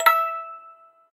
metal cracktoy crank-toy toy childs-toy musicbox
childs-toy, cracktoy, crank-toy, metal, musicbox, toy